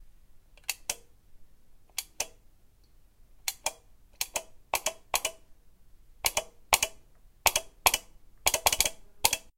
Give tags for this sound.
button
click
switch
press
light
short
pressing

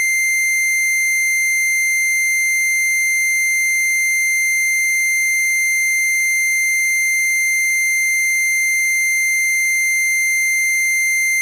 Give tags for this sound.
A-100
A-110-1
analog
analogue
electronic
Eurorack
modular
multi-sample
oscillator
raw
rectangle
sample
square
square-wave
synthesizer
VCO
wave
waveform

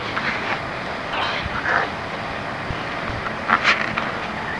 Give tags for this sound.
ambient
click
experimental
live
outdoor
park
triphop

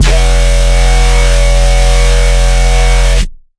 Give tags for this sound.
Brutal
FM
150BPM
Excision
Synthesized

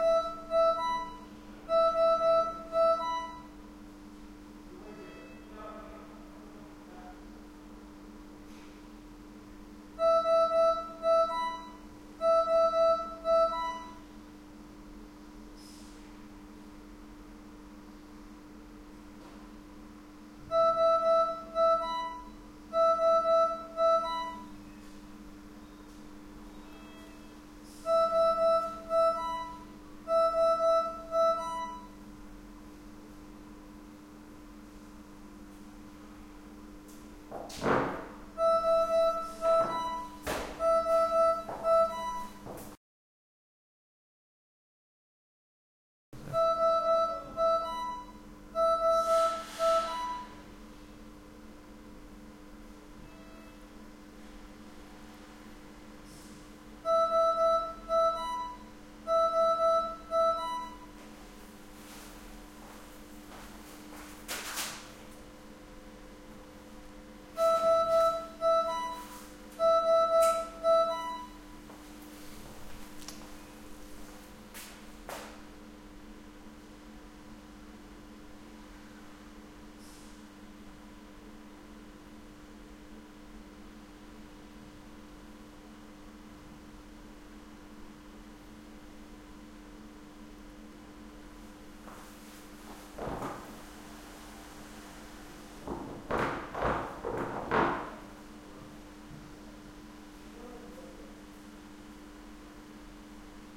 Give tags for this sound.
operating
quiet

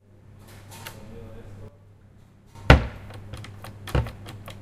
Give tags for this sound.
cafeteria
campus-upf
UPFCS12

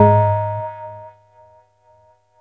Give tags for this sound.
bell
reaktor
multisample

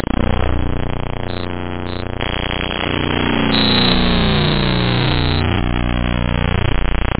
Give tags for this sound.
abstract
audio-paint
dare-26
digital
electric
electronic
freaky
future
glitch
growl
image-to-sound
lo-fi
machine
machine-shutdown
motor
noise
power-down
sci-fi
scifi
sfx
sounddesign
strange
weird

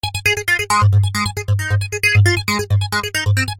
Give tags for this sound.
bass
guitar
loops

